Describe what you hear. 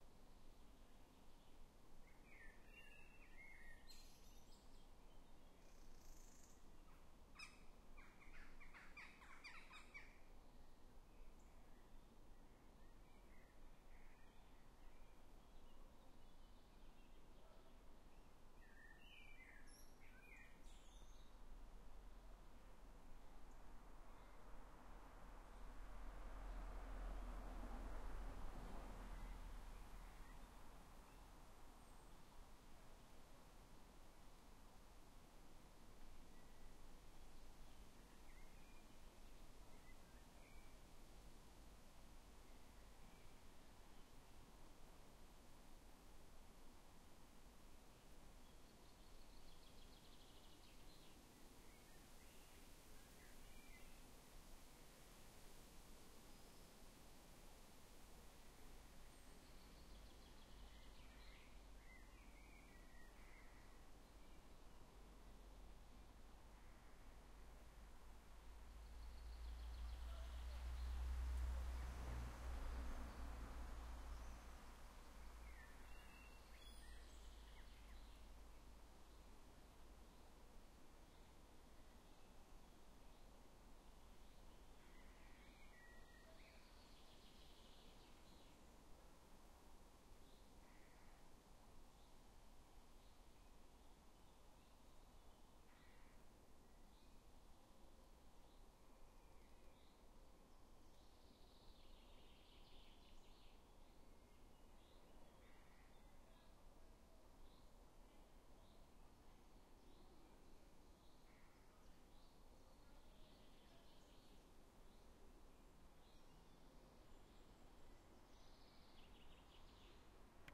Summer forest ambiance with birds/wind&leaves throughout 2
Apologies for cars throughout and occasional feedback
Summertime forest ambiance recorded in Ireland.
Recorded with Tascam DR-05
ambiance, ambience, ambient, birds, field-recording, forest, general-noise, nature, river, soundscape, summer, wind